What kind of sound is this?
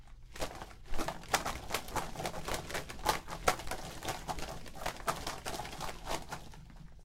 This is a foley of a bird flapping its wings it was done with gloves, this foley is for a college project.